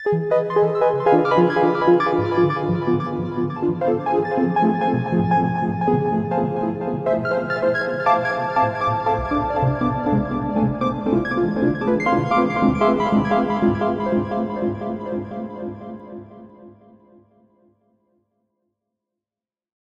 A sound I made in MetaSynth using the spray paint tool and sine waves. 30-Bpm,harmonic minor.
ambient, electro, electronic, FX, MetaSynth, synth